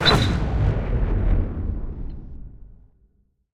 Annulet of Rage
The ultimate annulet to wear to hell
sound, short, metal